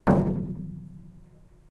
Plasticwaterjug BigBelly
Plastic water jug big hit (natural reverb), recording live sample with finger strike
hit percussion drum echo live-sample rhythm percussive percs drum water-drum wood big-hit plastic reverb
drum, echo, live-sample, percussion, plastic, rhythm, wood